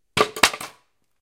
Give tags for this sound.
drop roll wood

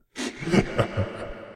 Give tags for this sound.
ambiance creepy drama evil fear fearful haunted horror laugh phantom scary sinister spooky suspense terror

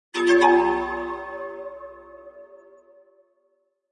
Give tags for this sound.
fear gamesound hit horror percussion suspense

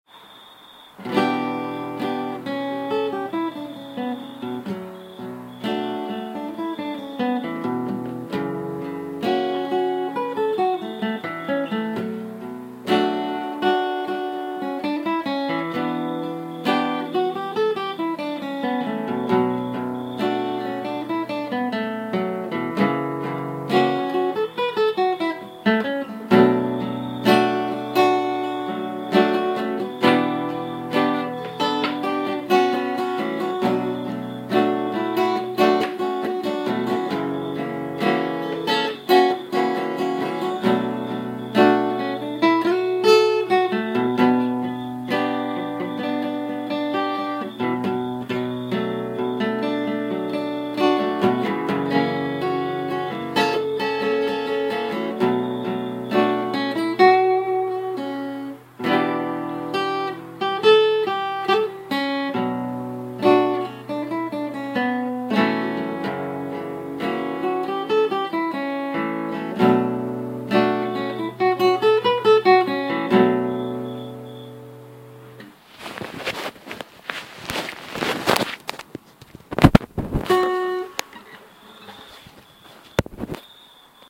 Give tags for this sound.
acoustics exper Guitar Instrumental Strings

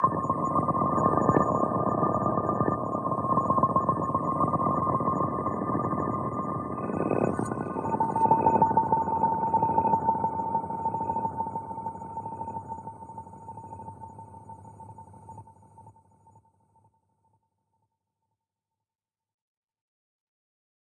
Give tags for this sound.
Bottle Bubbles digital Drink fl Glass Liquid Loop Pouring Splash studio Water

